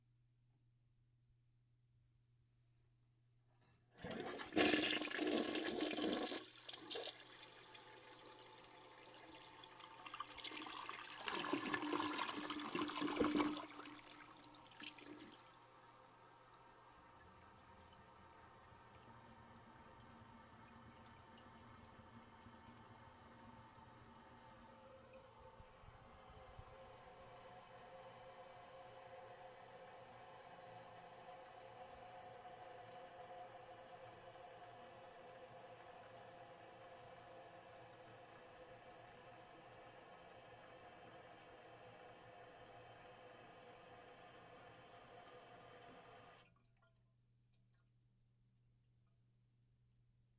untitled toilet flushing
field-recording, flushing, toilet